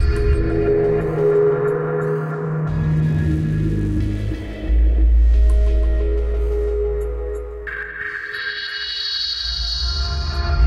Layering/stretching sounds to insanity out of this Sound Pack located here.
This sound or sounds was created through the help of VST's, time shifting, parametric EQ, cutting, sampling, layering and many other methods of sound manipulation.
๐Ÿ…ต๐Ÿ† ๐Ÿ…ด๐Ÿ…ด๐Ÿ†‚๐Ÿ…พ๐Ÿ†„๐Ÿ…ฝ๐Ÿ…ณ.๐Ÿ…พ๐Ÿ† ๐Ÿ…ถ